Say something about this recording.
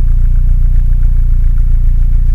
car idle
2002 Mini Cooper with a 1.6L inline-4 engine at warm idle. Loops seamlessly. Recorded with a Roland Edirol R-09HR and edited in Audacity.